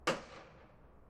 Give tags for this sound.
sampling recording midi live

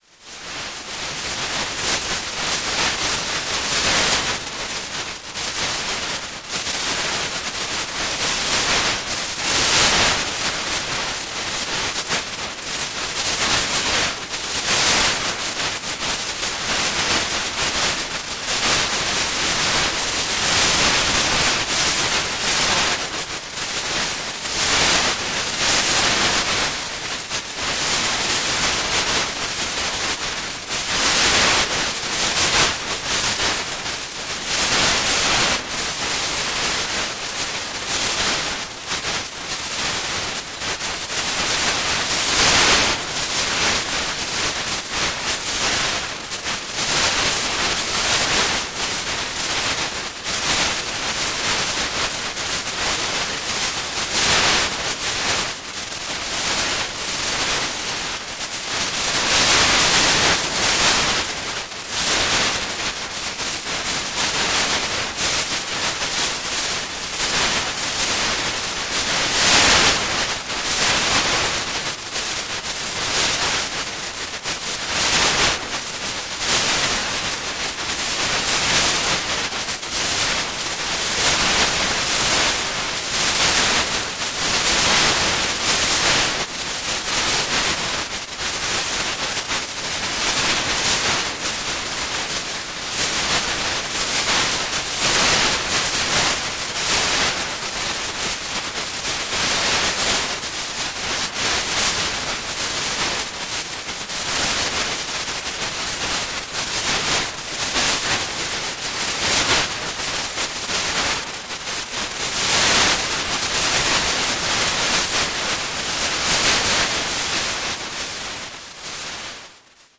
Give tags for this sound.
noise reaktor drone soundscape effect electronic

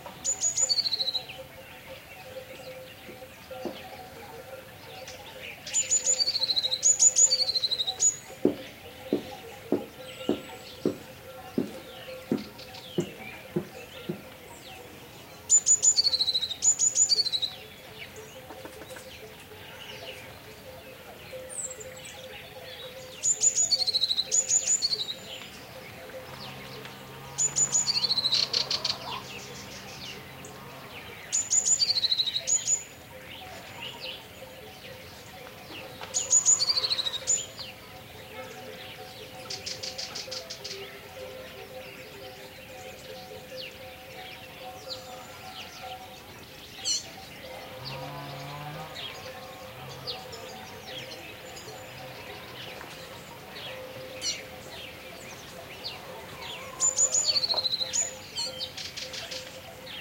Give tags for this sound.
farm ambiance sheep bird spain field-recording chirping

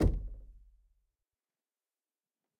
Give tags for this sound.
percussion hit bang wooden tap

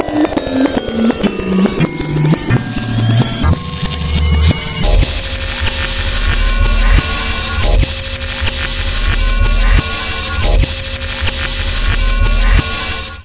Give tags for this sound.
electronic glitch pitch synthetic

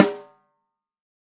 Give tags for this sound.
artwood custom drum multi sample shure sm57 snare tama velocity

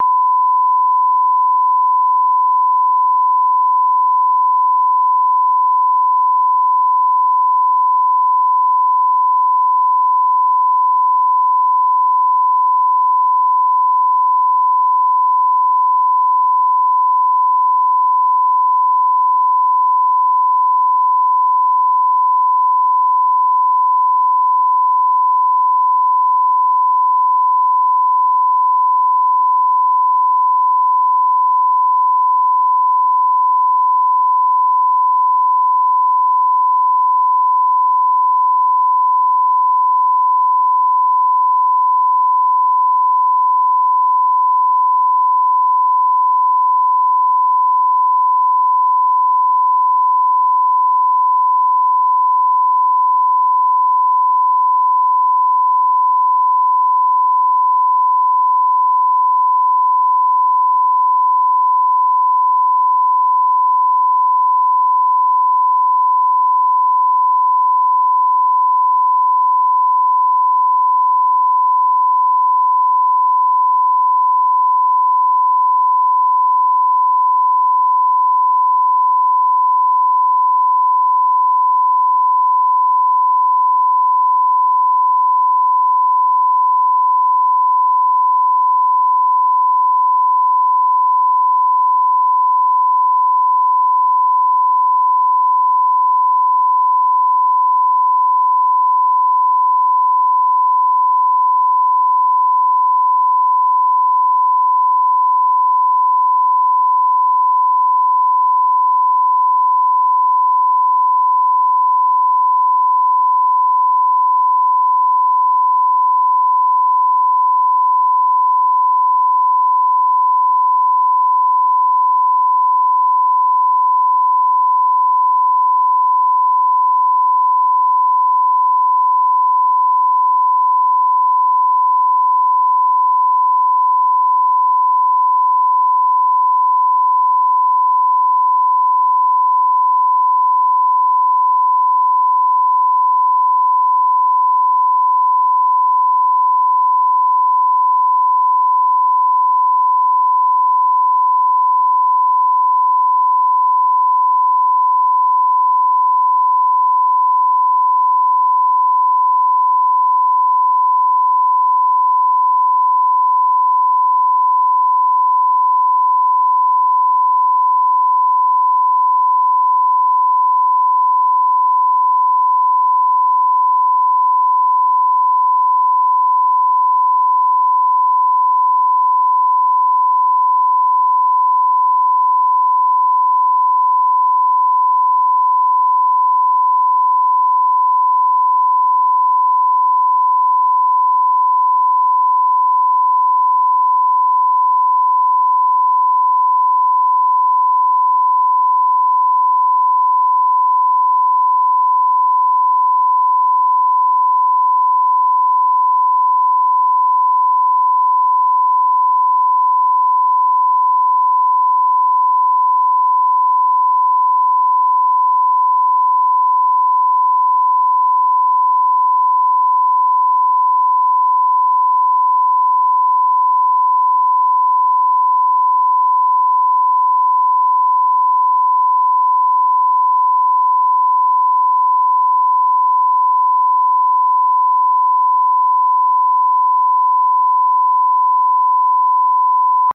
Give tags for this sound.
sound electric synthetic

